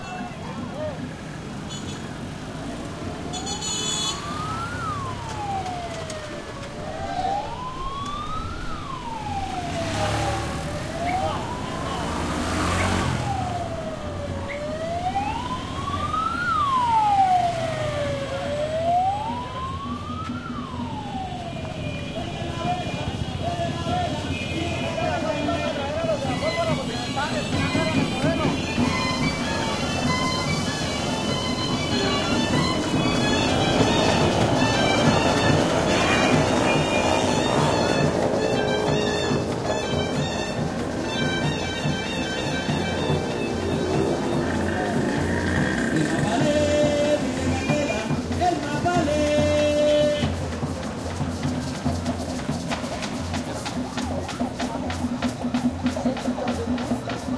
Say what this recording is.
street party 40th anniversary motorcycle rumble and woop sirens and float with salsa band approach Saravena, Colombia 2016
street party 40th anniversary motorcycle rumble and woop sirens and float with band approach (no it doesn't sound like salsa but it's uploaded now) Saravena, Colombia 2016
street, party, band, Colombia